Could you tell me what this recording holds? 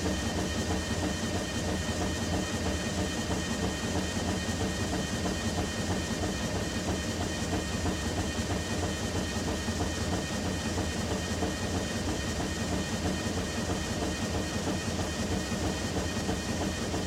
cardboard factory machine-014
some noisy mechanical recordings made in a carboard factory. NTG3 into a SoundDevices 332 to a microtrack2.